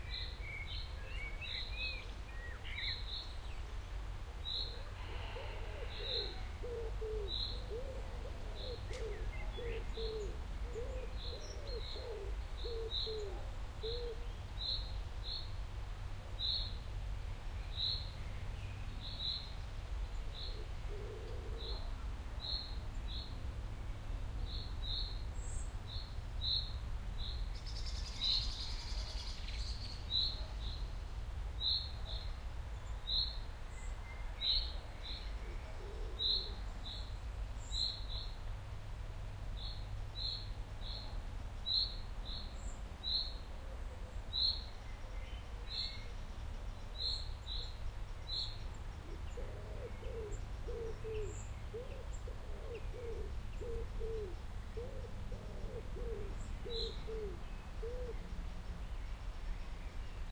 SuburbanSpringAfternoon-MS TestSetupPart6
Part 6 in a 6 part series testing different Mid-Side recording setups. All recordings in this series were done with a Sound Devices 302 field mixer to a Sound Devices 702 recorder. Mixer gain set at +60dB and fader level at +7.5dB across all mic configurations. Fader level on channel 3 is set at 0dB. Mixer - recorder line up was done at full scale. No low cut filtering was set on either device. Recordings matrixed to L-R stereo at the mixer stage. The differences between recordings are subtle and become more obvious through analyzers. Interesting things to look at are frequency spectrum, stereo correlation and peak and RMS levels. Recordings were done sequentially meaning one setup after the other. Samples presented here were cut from the original recordings to get more or less equal soundscapes to make comparing easier. Recordings are presented here unmodified. Part 6: mid-side +mid setup: Pearl MSH-10 single point MS microphone + DPA 4060 omni.
field-recording, atmosphere, outdoor, birds, mid-side, spring